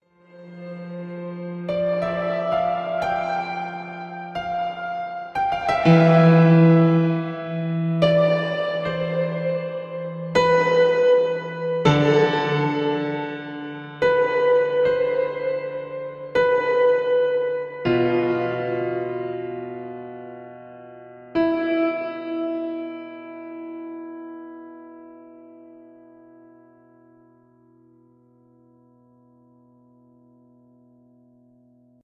quarter cine
These harps and piano are apart of the other epic music made within logic
cinematic, film, harp, movie, orchestral, piano, repetition, slow, strings